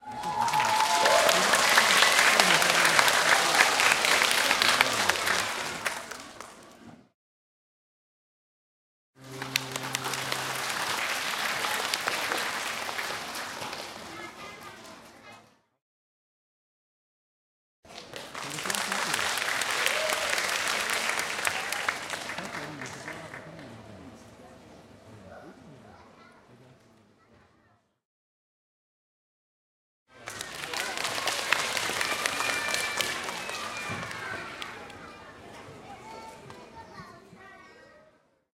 190627 clapping crowd mono
crowds clapping in mono format
applaud, applause, clapping